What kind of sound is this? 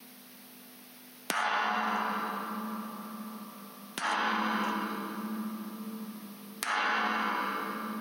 Industrial springs recorded with piezos